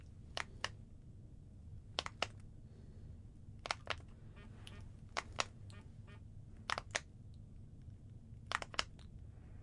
Tactile Button Click